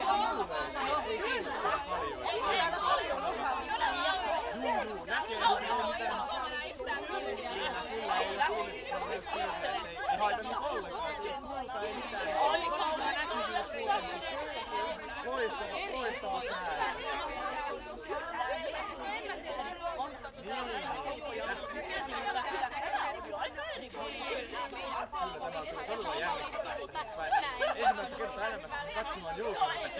people having a loud argument in a crowd
arguing, crowd, people